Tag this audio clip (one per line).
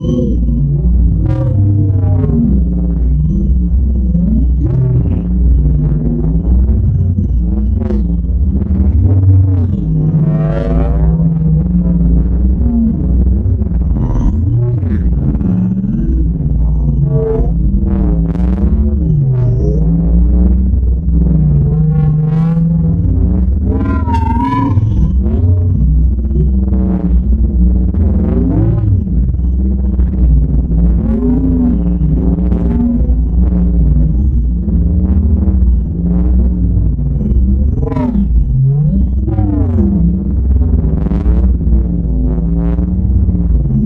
ambience
science